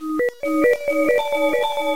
Dropping echo
Rough sine-wave form dropping in pitch as it echoes.
Created using Chiptone by clicking the randomize button.